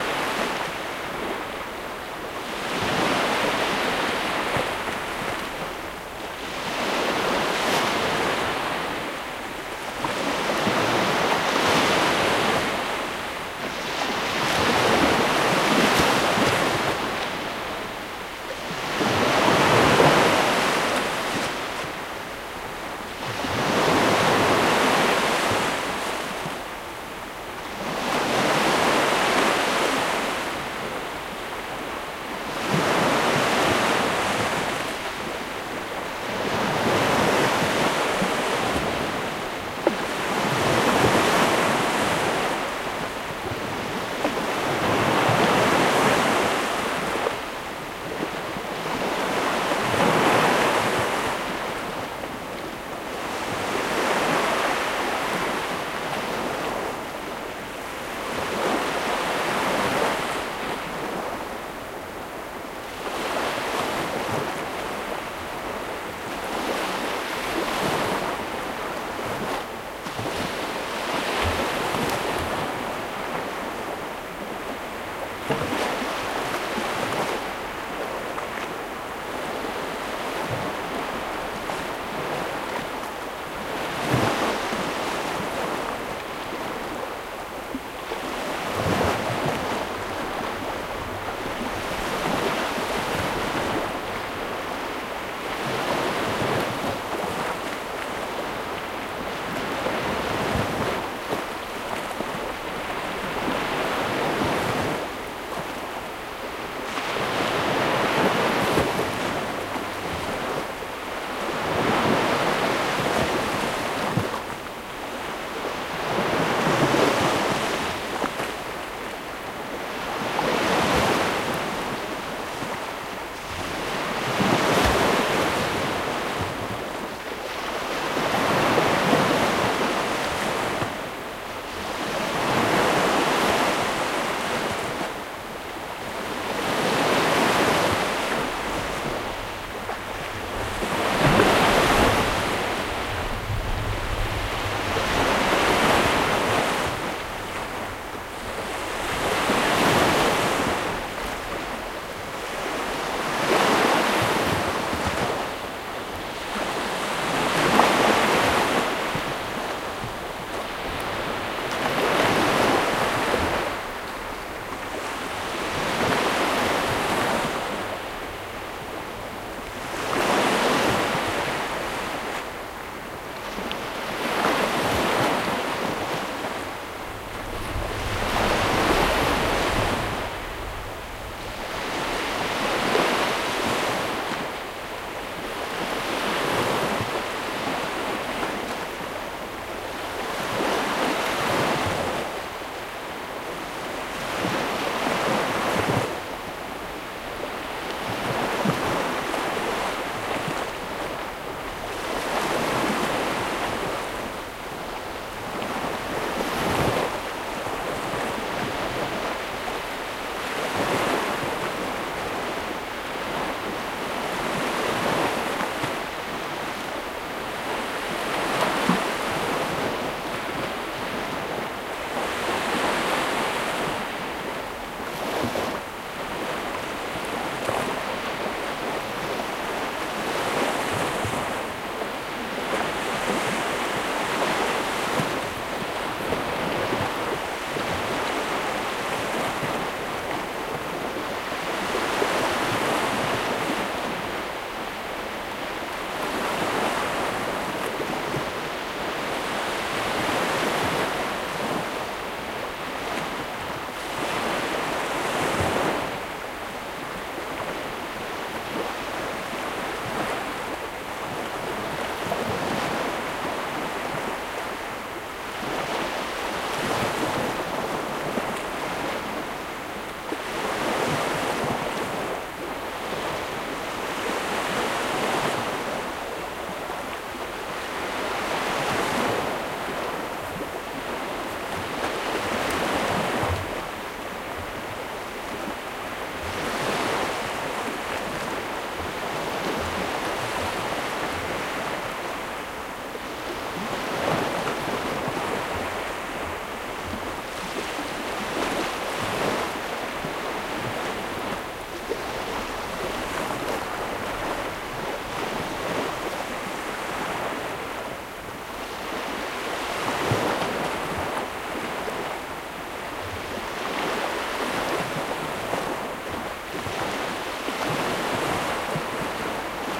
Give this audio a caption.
Baltic Sea - Kolka, Latvia
Recorded in Latvia, Kolka at Ēvažu stāvkrasts in late summer 2015. XY stereo recording with mics placed around 50 meters from the water.